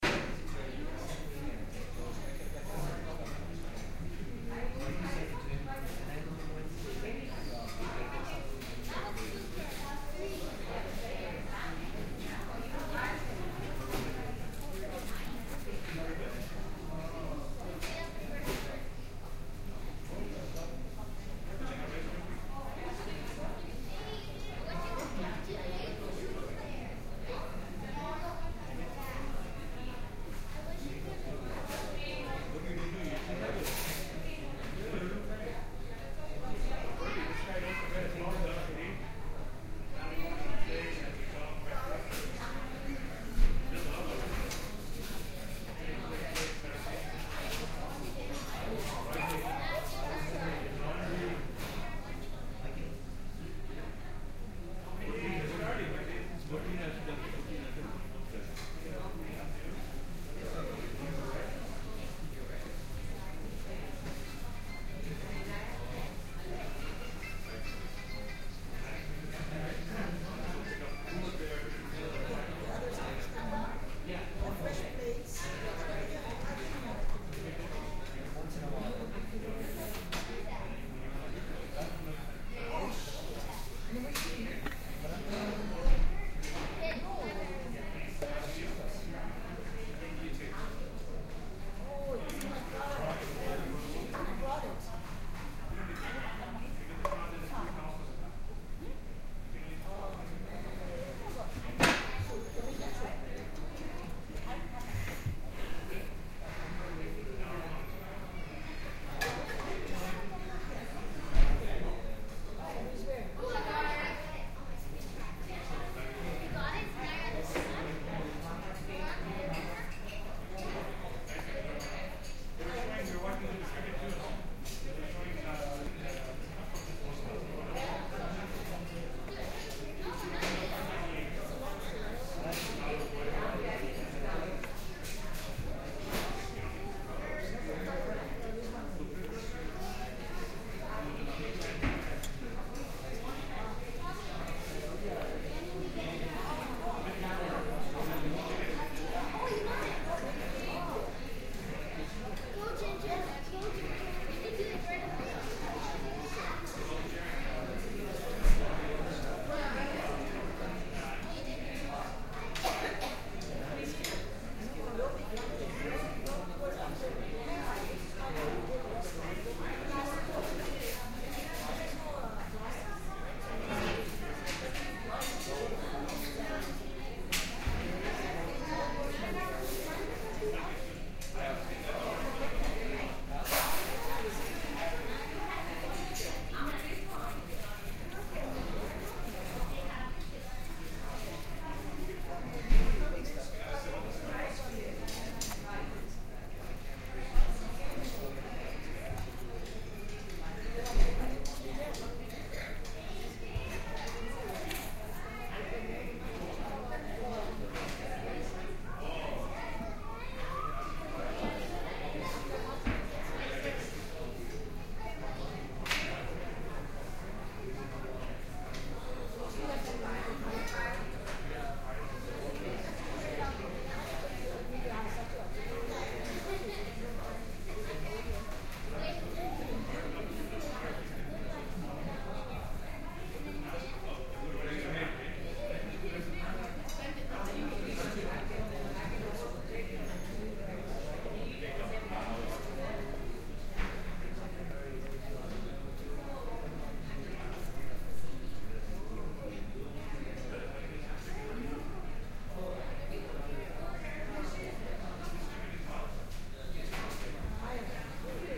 Binaural stereo recording of a small casual restaurant. Conversation, some plate/fork noises here and there.